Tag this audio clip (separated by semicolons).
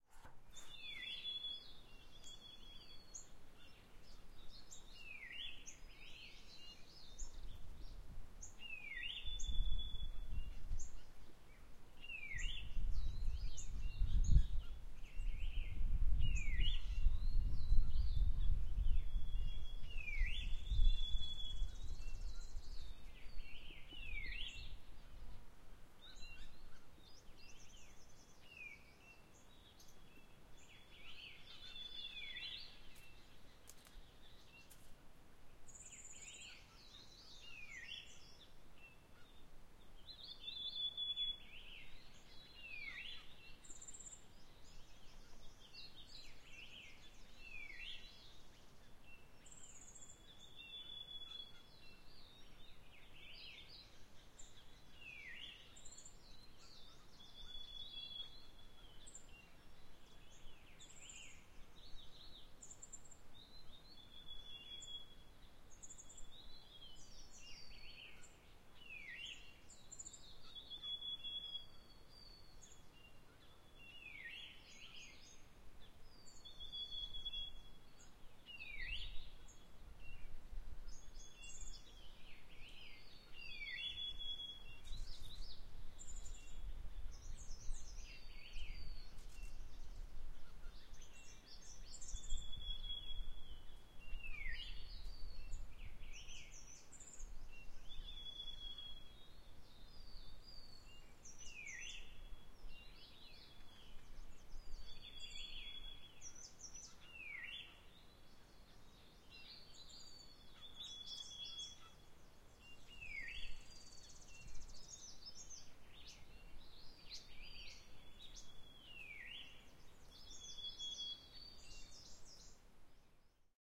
Himalayas; India; Rashol